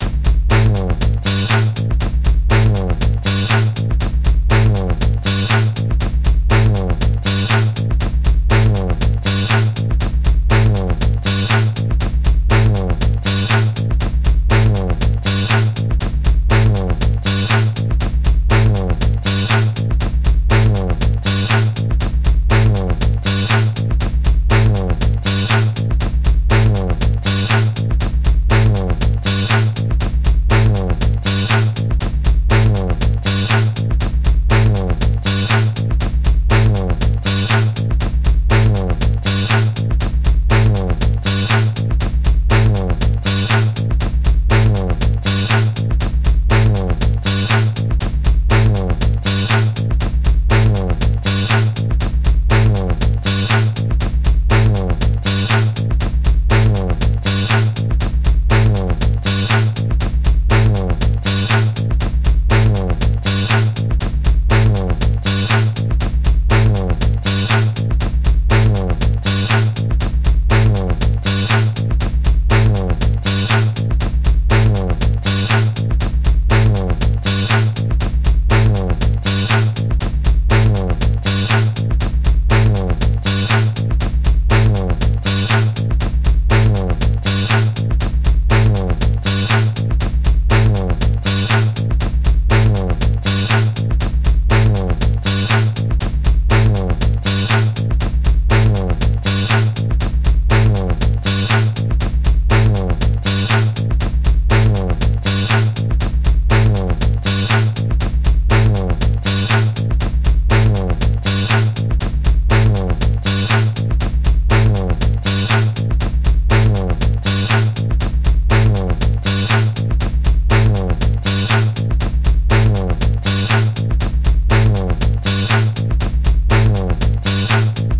Bass loops 003 with drums long loop 120 bpm
120, 120bpm, bass, beat, bpm, dance, drum, drum-loop, drums, funky, groove, groovy, hip, hop, loop, onlybass, percs, rhythm